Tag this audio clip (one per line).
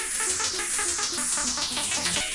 102
acid
bpm
club
dance
delay
dub
dub-step
electro
house
loop
minimal
rave
techno
trance
tunes